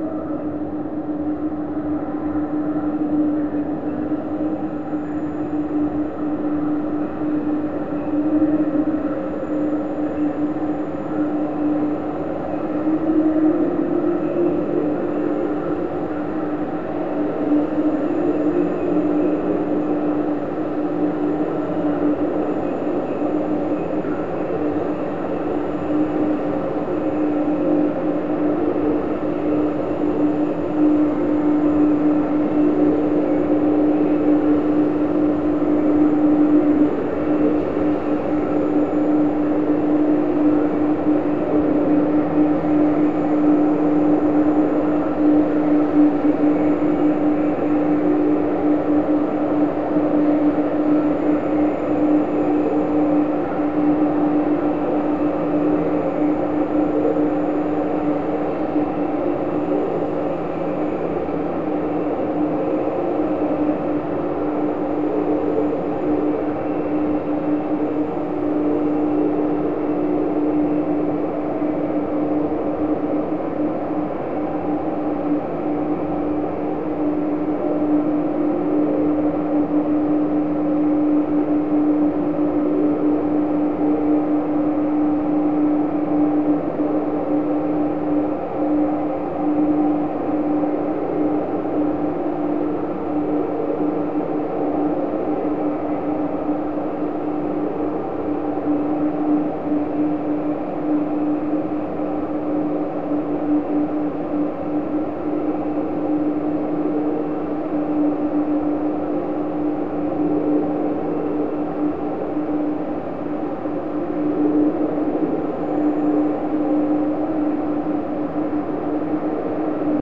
This is abstract background atmosphere. It *suggests* presence of many people, and busy place but it contains no voices.